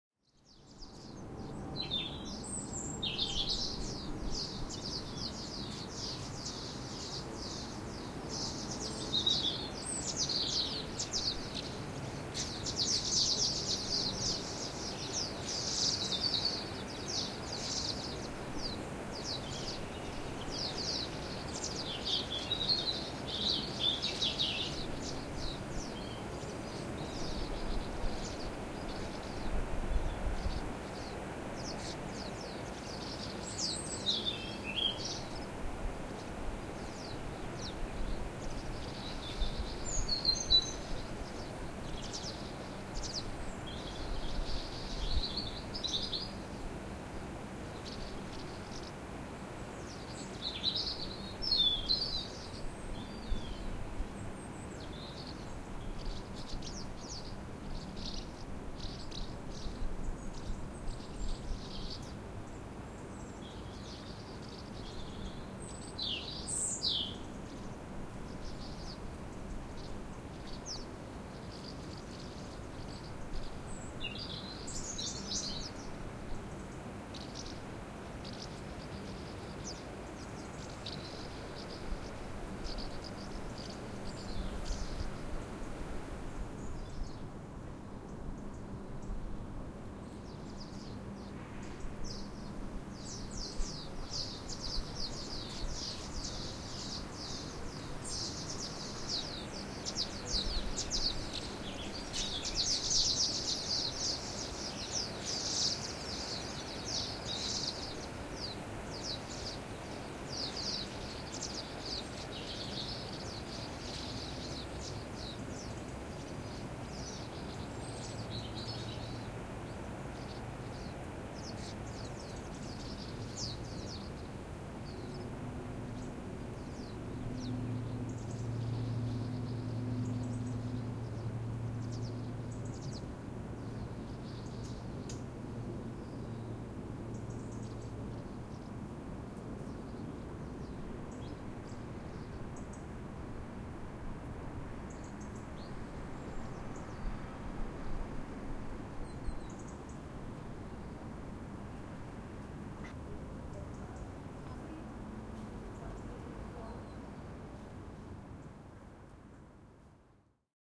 33 Urban Background Sound
I made a series of recordings of urban sounds from my open living room window between late July and early September 2014. These recordings were done at various times of the day.
I am using these as quiet background ambiance on a short play due to be performed in the near future. Recorded with a Roland R26.
summertime-urban-garden, Quiet-urban-background